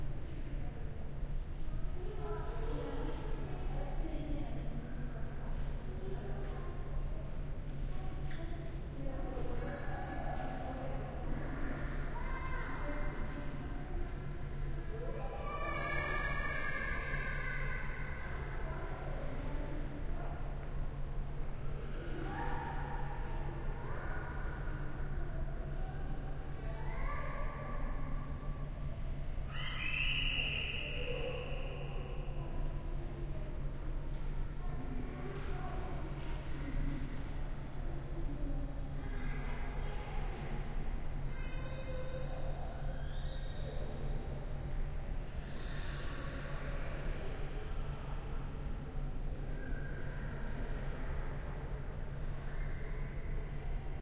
Museum Gallery, Children in Other Room
airy, ambience, art, chatter, children, echo, gallery, museum, people, room, tone